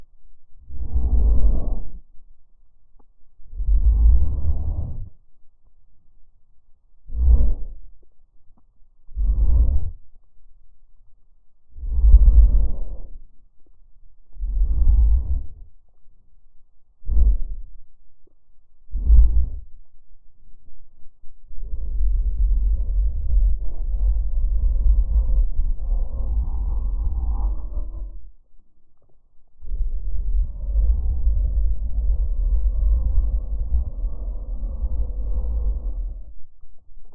The Force from Star Wars (Choke, Push, Pull...)
Deep bass Force sound effect. Tried to do short ones for push and pull and also longer one for things like choke or grip.